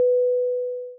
airplane security safe belt tone announcement synth
fasten the seat belt
airplane, seat-belts